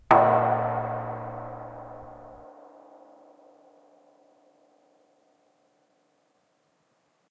propane tank deep hit 2
Field recording of approximately 500 gallon empty propane tank being struck by a tree branch. Recorded with Zoom H4N recorder. For the most part, sounds in this pack just vary size of branch and velocity of strike.
field-recording, hit, metallic, propane, reverberation, tank, wood